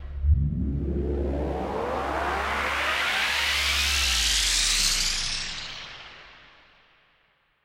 From a collection of sounds created for a demo video game assignment.
Created with Ableton Live 9
Absynth
Recording:Zoom H4N Digital Recorder
Bogotá - Colombi